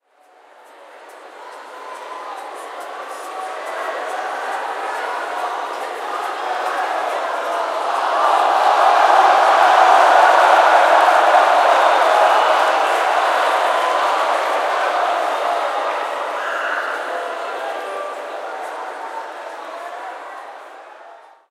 Crowd Cheering - Soft Cheering 1
A sound of a cheering crowd, recorded with a Zoom H5.
cheering, entertainment, games, stadium, hall, loud, people, cheer, event, audience, crowd, sports, concert, big